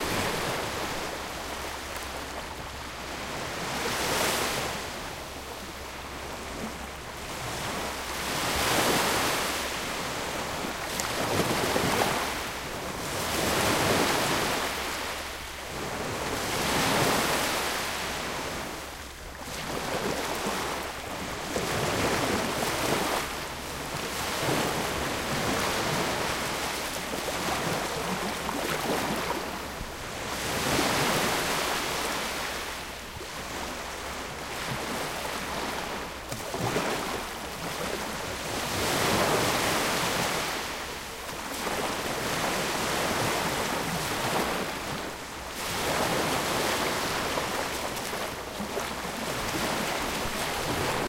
Baltic Sea 3
sea, water, wave